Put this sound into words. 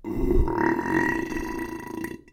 A faltering burp.
belch, burp, disgusting, gross